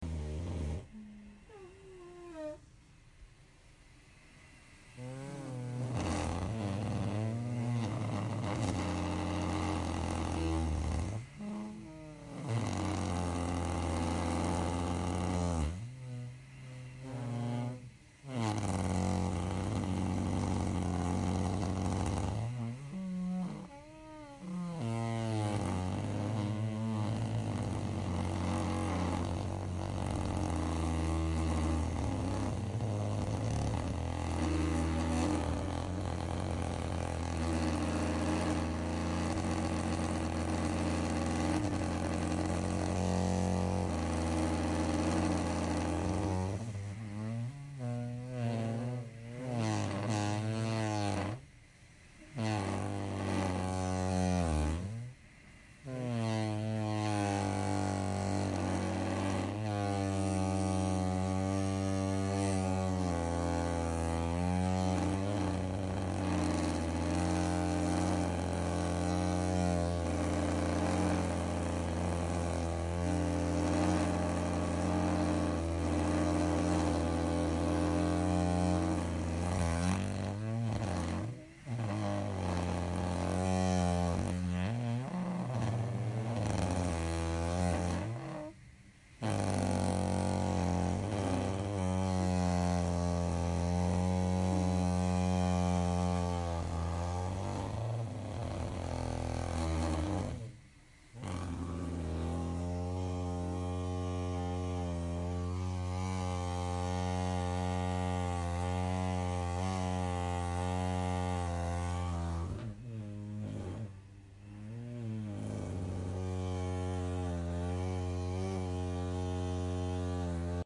Tony tuba engine with tappit noise and farts-
This is audio I recorded of my husband using his ten year old decrepit Constant Positive Air Pressure sleeping machine. I was trying to convince him that he needed a new one. The variety of sounds he and it managed to make is extraordinary. The intermittent beeping is the machine's warning sound.
When I played it for him he cried laughing. I hope you find it useful for something.
You'll be please to know his new machine is whisper quiet.
alien, apnea, bed-recording, breathing, choke, CPAP, fart, toot, wheezing